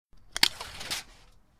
Measuring Tape #10
Sound of a measuring tape being extended.
hardware, music152, tool, measuring, measuring-tape, tape